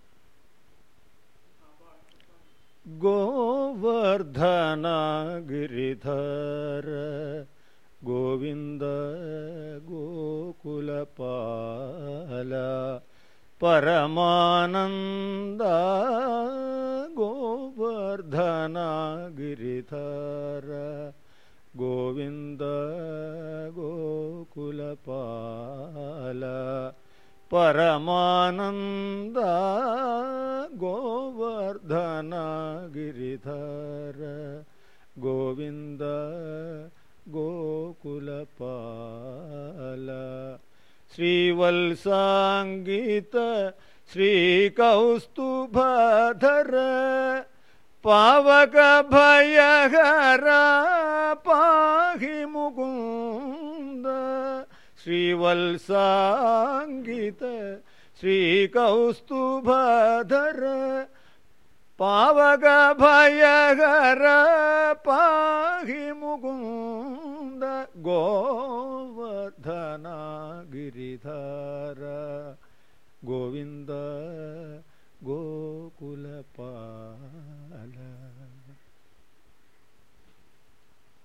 music school india
from indian music school , kerala .recited by mr. mohanan
compmusic, gamaka, hindustani, india, music